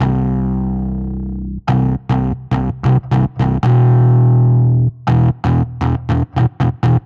bass guitar.skankified.